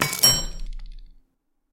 Kill / Crafting sound
A metallic, layered sound for a kill or crafting sound.
Good for video games.